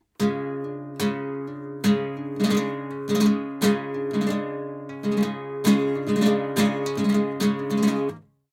Strum Thump 2
Some parts left over from a Flamenco recording session.